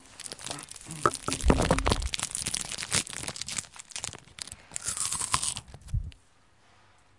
Someone eating a chocolate snack.
Recorded with a H4N recorder at the library.
Edited with Adobe Audition CS6 (2009) : I cleaned the noise and deleted some unnecessary parts.
Recorded in Madrid, Universidad Europea de Madrid Campus de Villaviciosa de Odon, 29/Septiembre/2015 at 4:15 pm
bite, chewing, chips, chocolate, crunch, crunchy, eat, eating, food, munch, noise, snack